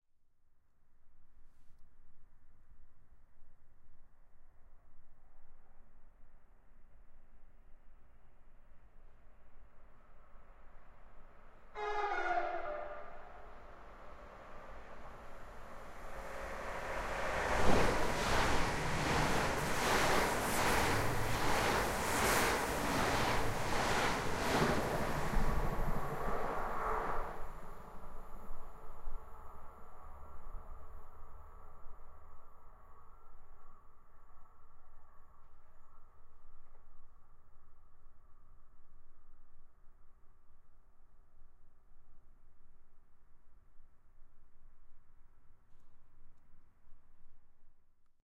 Recording of a passing train on the platform. 2 meters from the train.
Horn sounds in the beginning of the track.